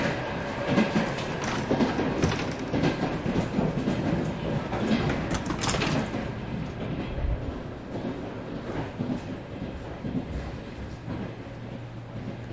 suburban train 3
Moscow region suburban train. Old wagon interior.